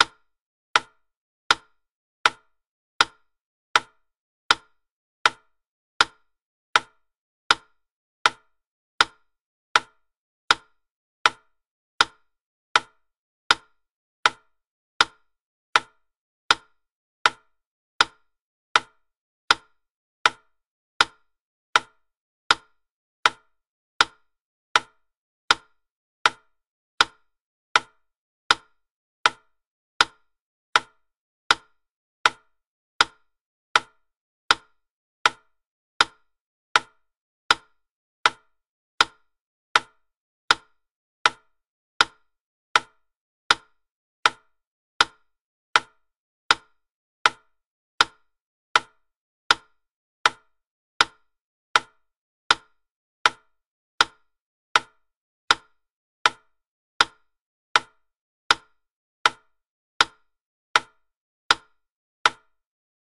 Wooden Wittner metronome at 80 BPM, approx 1 minute duration.